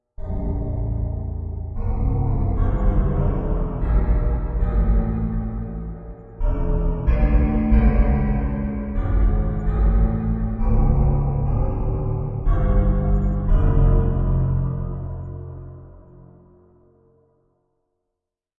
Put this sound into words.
Something Evil Approaches, A
Threw one of my recordings of a piano string being struck with a metal mallet into a sampler, played a low melody and added a bunch of reverb. Sounded rather evil.
An example of how you might credit is by putting this in the description/credits:
The sound was recorded using a "H1 Zoom recorder" on 11th November 2017, also with Kontakt and Cubase.